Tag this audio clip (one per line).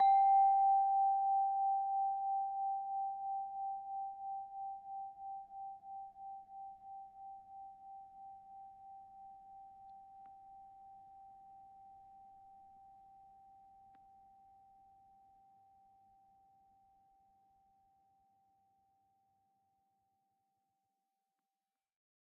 crystal-harp
hifi
sample